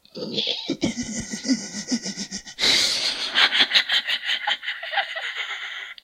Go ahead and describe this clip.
Evil laugh 04 - Gen 4
New laughs for this years Halloween!